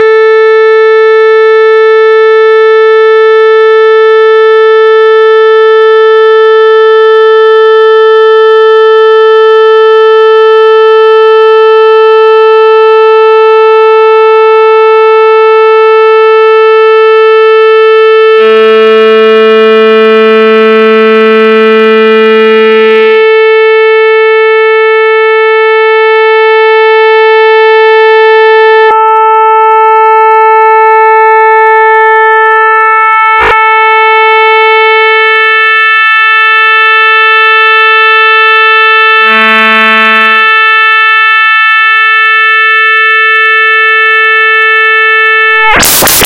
from simple 220hz sound, to more chaotic A 220hz notes
made from 2 sine oscillator frequency modulating each other and some variable controls.
programmed in ChucK programming language.